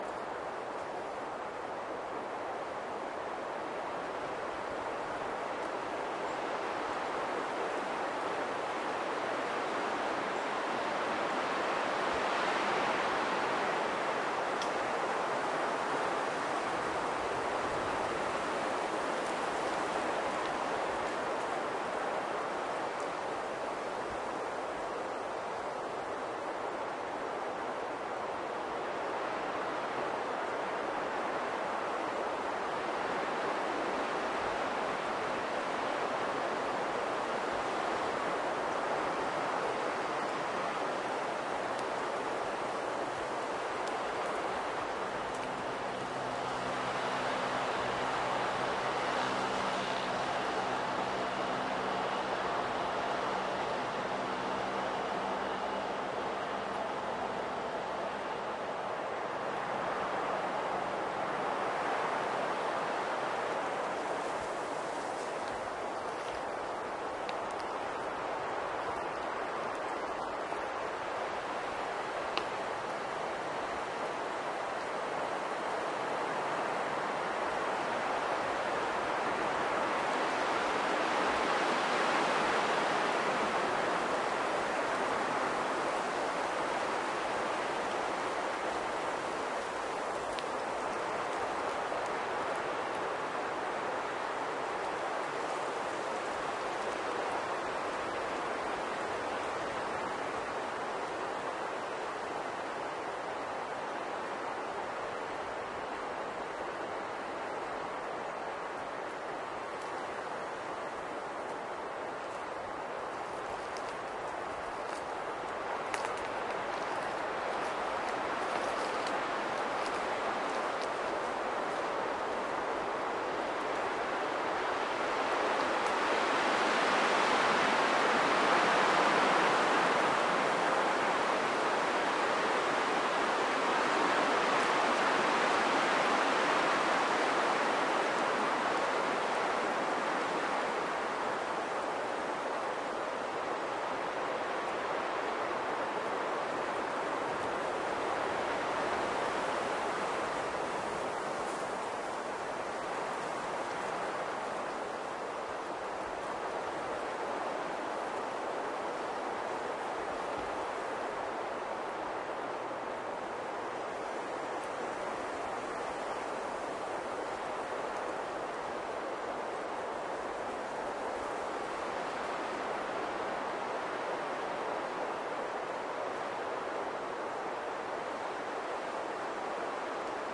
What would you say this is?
20061124.windy.forest
noise of strong wind gusts on pine trees. A few bird calls and a distant motorcycle. Rycote windshield- sennheiser me66+AKG CK94-shure fp24-iRiver H120, decoded to mid-side stereo.
ambiance, autumn, forest, nature, pines, wind, woodland